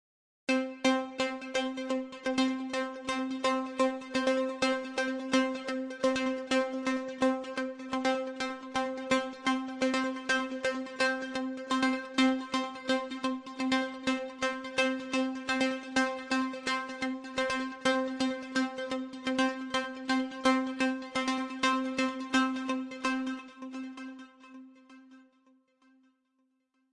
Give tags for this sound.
arpeggio
electronic
synth

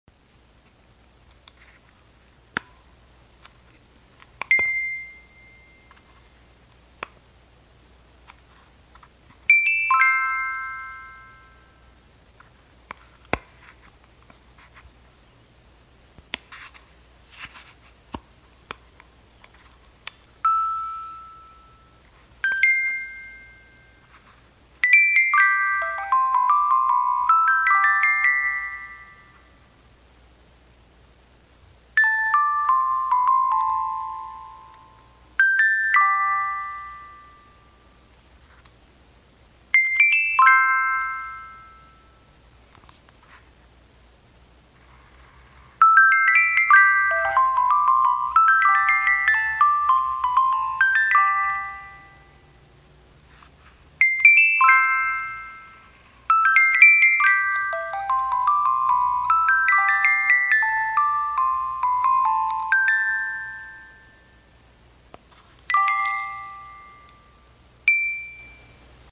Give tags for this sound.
antique
bells
box
broken
classical
crank
historic
kalimba
mechanism
music
music-box
musicbox
old
porcelain
rustling
song
vintage
wind
wind-up

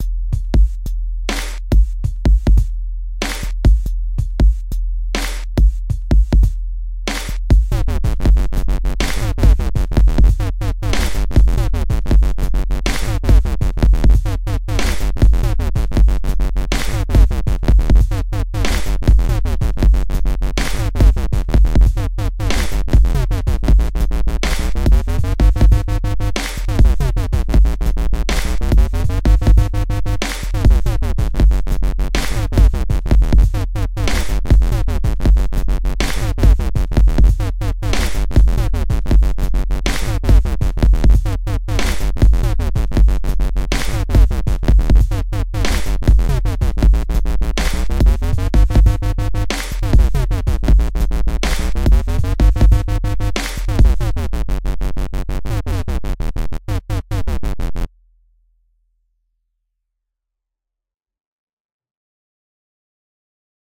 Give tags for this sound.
dub
stepper

